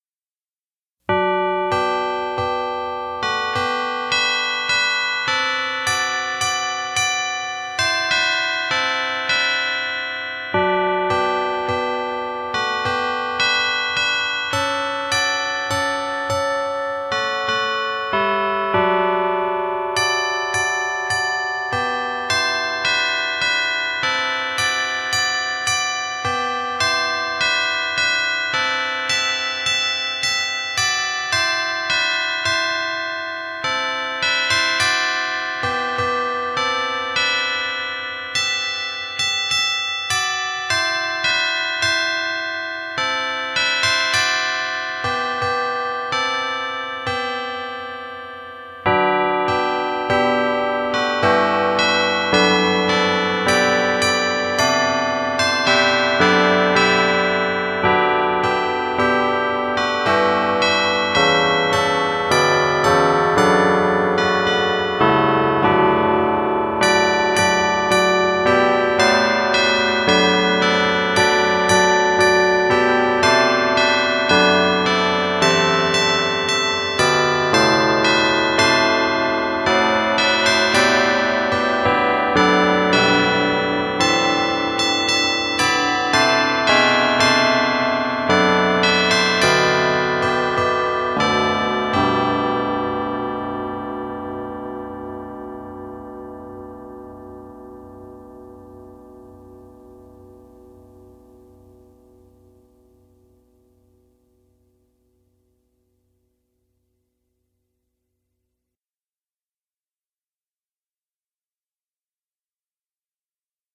Hark, The Herald Angels Sing (Maas-Rowe Digital Carillon Player) Read below
This is a late upload, so by the time it gets moderated, it might be passed Christmas. But anyways, here it is. This is the last Maas-Rowe DCP (Digital Carillon Player) song that I promised I would upload in December. This is "Hark, The Herald Angels Sing", and it's another great song from the DCP. Oh, and this is also the last song from the Maas-Rowe songs that I currently have. This song is also dedicated to all my followers who followed me these passed many years. I've been a user here for a little over 9 years now, so I'm grateful that so many people like my sounds and want to use them. Let's make 2021 another great year full of more amazing sounds, and I will be sure to contribute a TON next year. I will be posting again in January., so have an amazing and safe Christmas and New Years. Talk to you all soon. Bye for now, guys.